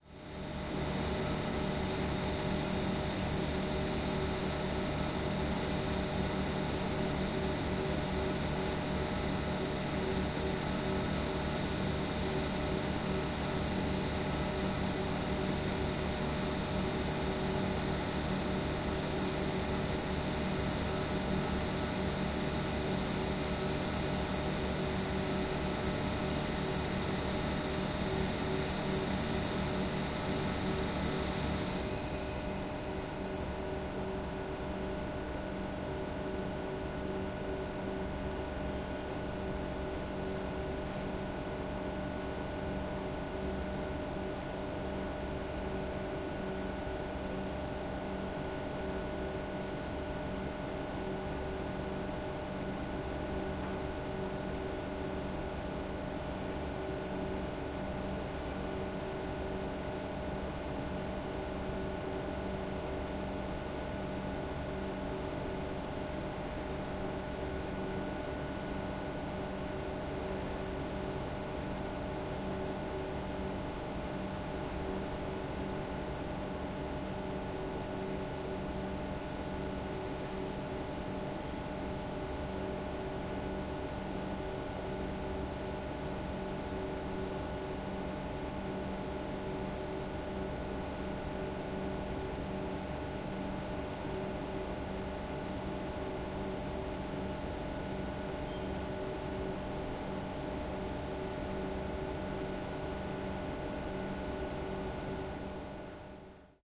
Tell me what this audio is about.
110804-cold store
04.08.2011: fifth day of ethnographic research about truck drivers culture. The fruit-processing plant in Neuenkirchen in Germany. drone of huge cold store.